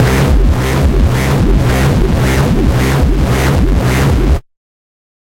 110 BPM, C Notes, Middle C, with a 1/4 wobble, half as Sine, half as Sawtooth descending, with random sounds and filters. Compressed a bit to give ti the full sound. Useful for games or music.
synthesizer, synthetic, wobble, bass, LFO, notes, dubstep, techno, digital, processed, Industrial, synth, electronic, porn-core, 1-shot, wah